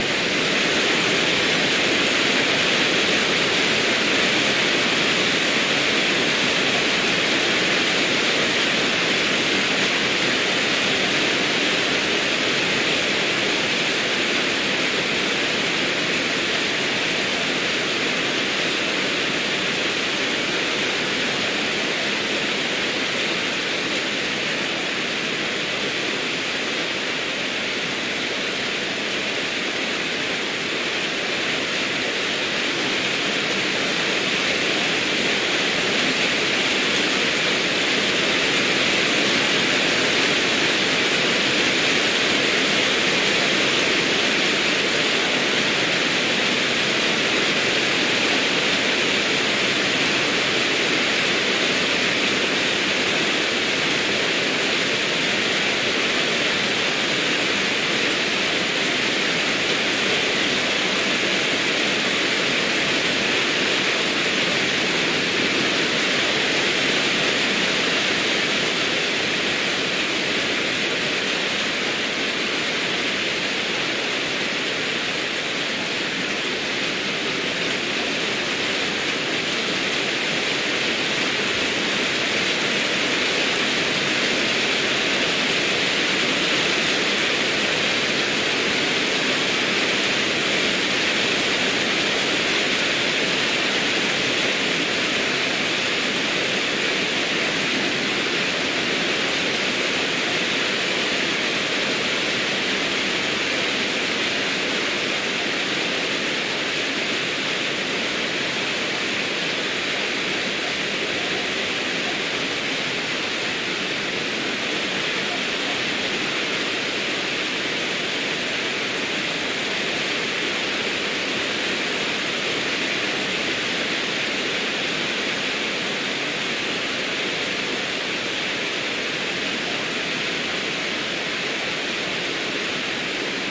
heavy-rain inside 2014
Heavy rain de- and increasing intensity and noise-level, midnight, winter-garten, patio from the inside
ambient, building, field, heavy, inside, patio, rain, recording